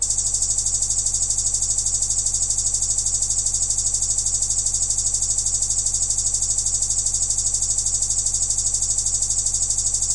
AUDACITY
Stereo channel:
- Cut section 17.490s to 17.724s
- Effect→Normailize...
✓Remove DC offset
✓Normailze maximum amplitude to: –3.0
✓Normalize stereo channels independently
- Select section: 0.139s to 0.235s
- Effect→Noise Reduction
Get Noise Profile
- Select all
- Effect→Noise Reduction
Noise reduction (dB): 12
Sensitivity: 6
Frequency smoothing (bands): 3
- Remove section after 0.150s
- Select section: 0.100s to 0.150s
- Effect→Fade Out
- Generate→Silence… (start at 0.150s)
Duration: 00h 00m 10.000s
- Select all
- Effect→Echo…
Delay time: 0.08
Decay factor: 1